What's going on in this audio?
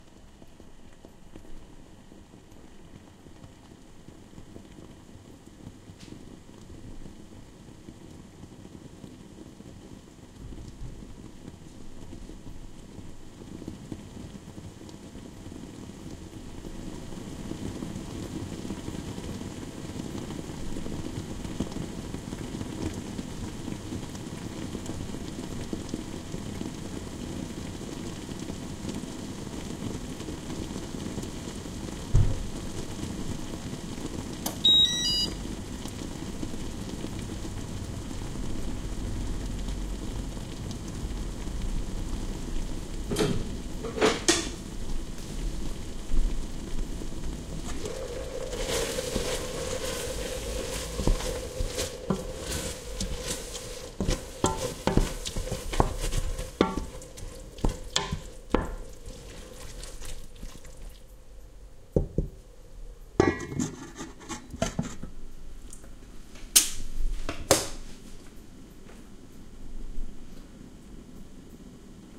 The sound of something boiling, then stirring sounds
kitchen, stir